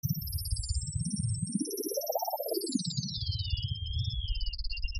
Percussive rhythm elements created with image synth and graphic patterns.
element, image, radio, shortwave, soundscape, synth